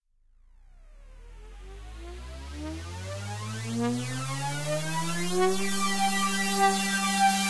This is a uplifter created in Serum and processed with third party effects. The sound would be most suitable in the build section of a EDM track to create tension before a section change.